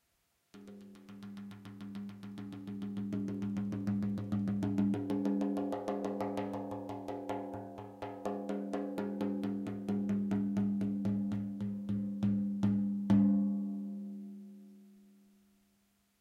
bendir accelerating
Accelerating from low and slow to fast and high and back to low and slow. Recorded with Zoom H2n and Sennheiser mic. No editing, no added effects. Might be useful as an fx.
Might be useful as a filler.
The money will help to maintain the website:
percussion, rhythm